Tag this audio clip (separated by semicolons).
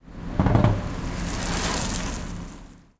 bike-passage; bike; rider; bicycle; passing; road-cycling; terrestrial; approach; passage; cycle; pedaling; ride